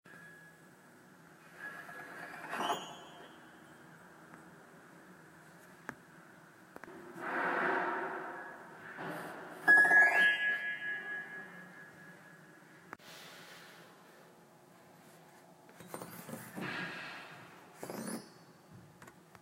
Piano Keys 1
Me playing keys from a random piano in the basement hallway of a large building.
Abandoned, horror, Piano, scary, spooky, terrifying